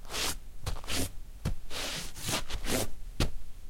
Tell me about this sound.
Torka av skor
The sound of me wiping my shoes.